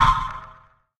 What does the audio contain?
STAB 029 mastered 16 bit
A short electronic percussive sound burst. Created with Metaphysical Function from Native
Instruments. Further edited using Cubase SX and mastered using Wavelab.
electronic, short, percussion, effect